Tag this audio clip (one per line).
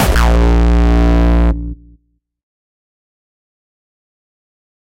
303
Acid
Acidcore
Distortion
Frenchcore
Hardcore
Kick
Kickdrum